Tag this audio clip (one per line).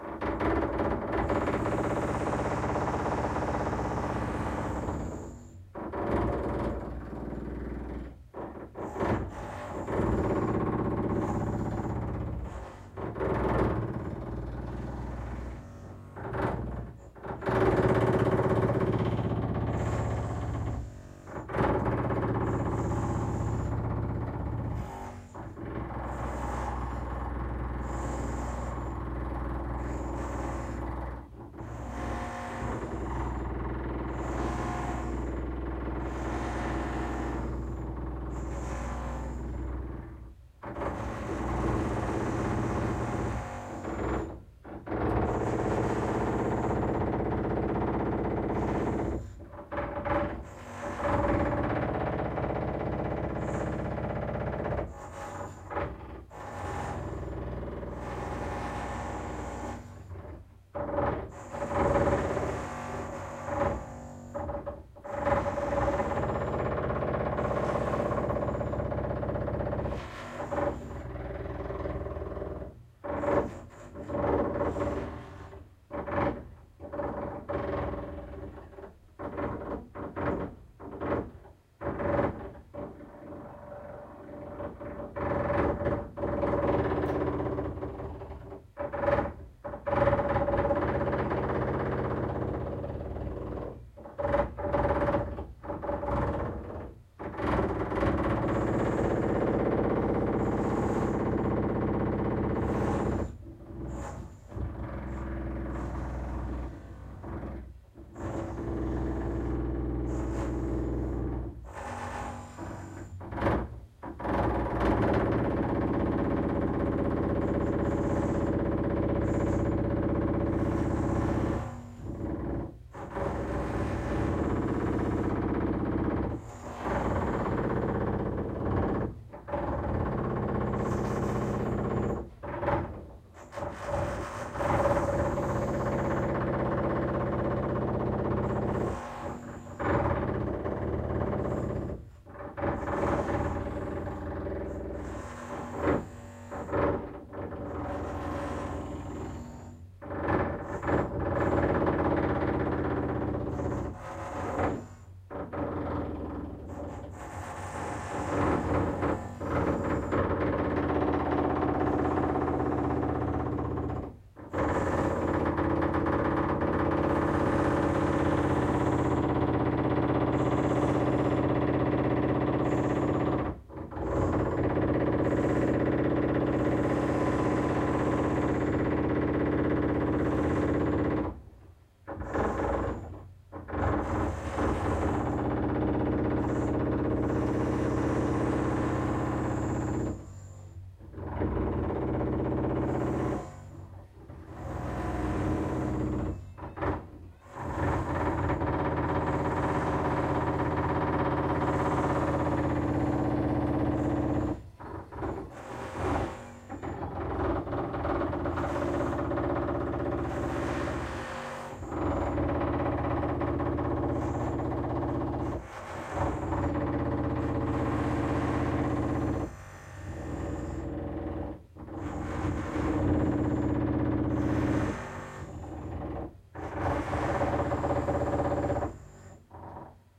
concrete
constructing
construction
drill
drilling
house
inside
loud
redecoration
renovation